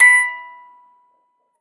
childs-toy cracktoy crank-toy metal musicbox toy
cracktoy, metal, musicbox, toy